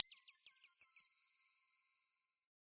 Bright digital GUI/HUD sound effect created for use in video game menus or digital sound application. Created with Xfer Serum in Reaper, using VSTs: Orbit Transient Designer, Parallel Dynamic EQ, Stillwell Bombardier Compressor, and TAL-4 Reverb.
machine, artificial, clicks, pitch, serum, computer, blip, gui, windows, game, digital, effect, sfx, command, click, bleep, noise, data, short, bright, hud, automation, electronic, synth, application, sound-design, synthesizer, bloop, interface